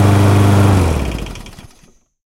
CM Lawnmower Shutdown 2
The sound of a lawnmower shutting down.
down, motor, cutter, landscaping